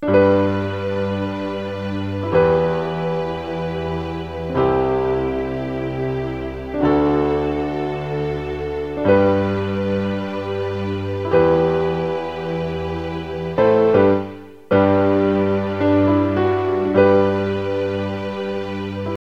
Piano/Strings Chord Progression
Original, Song, Music, Sample, Piano